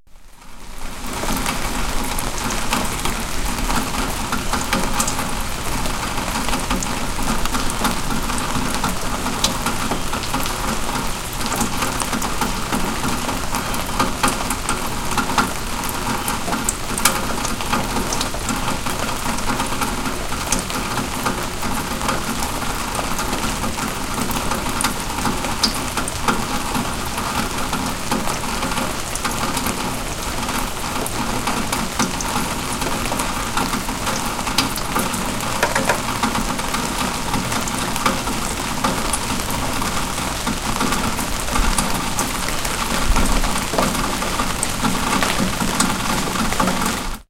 Rain falling through a tin gutter
rain, rain-gutter, water